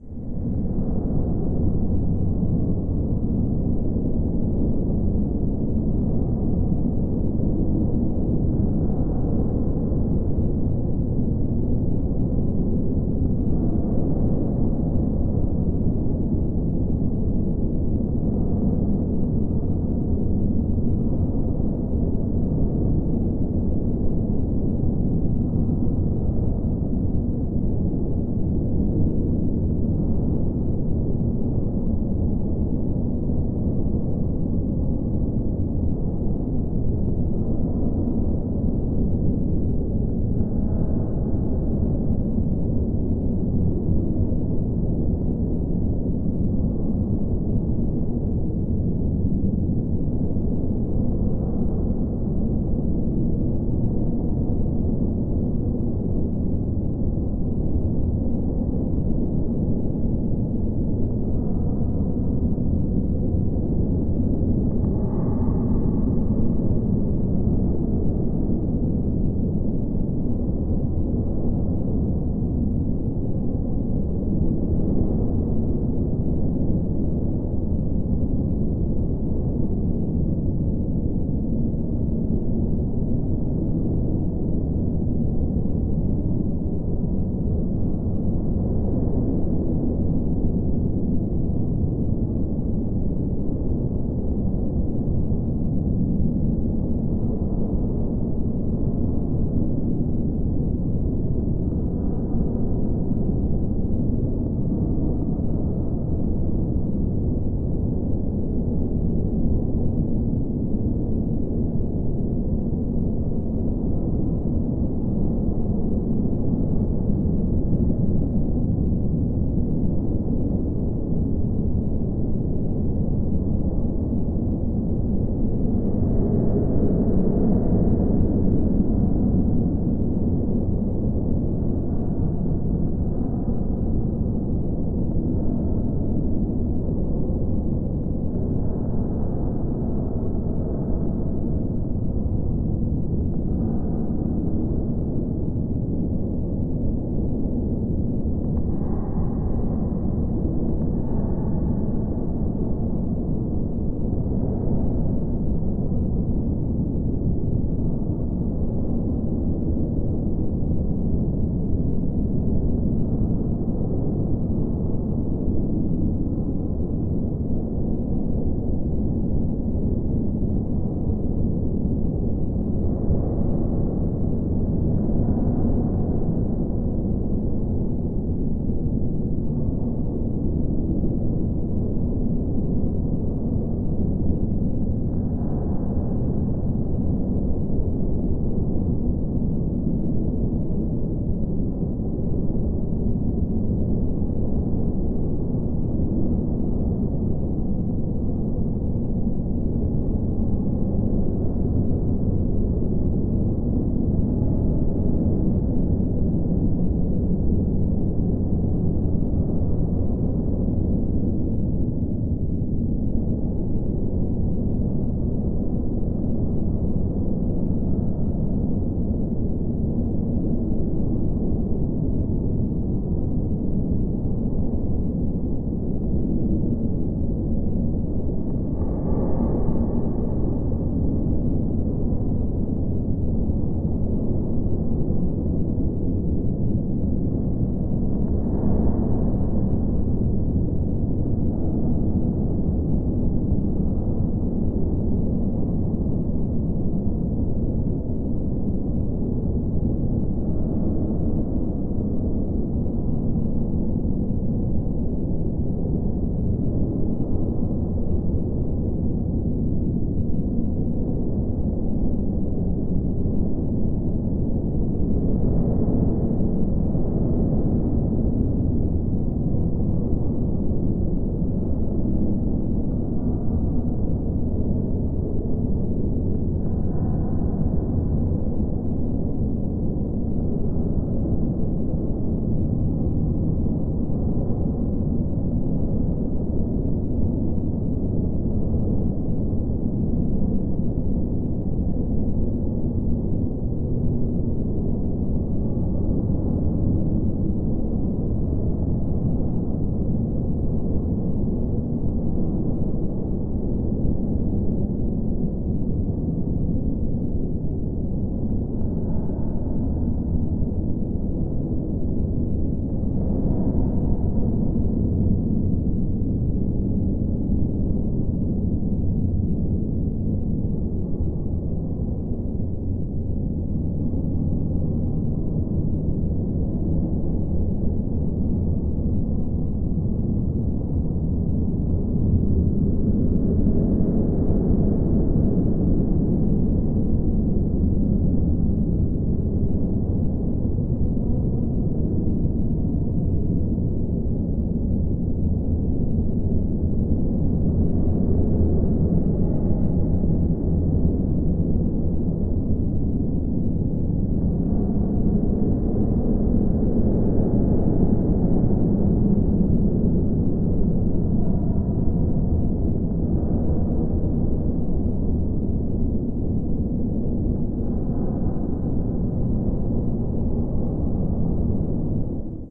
background noise wind stereo
Synthetic stereo slightly horrific wind ambiance, with voices whispering in the background.